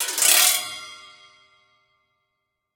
mar.gliss.resbars.updn2
Sample of marimba resonance pipes stroked by various mallets and sticks.